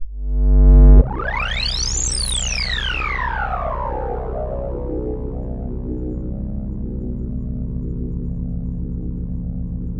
Possible Warp
Sounds from a small flash game that I made sounds for.
Alien, game, space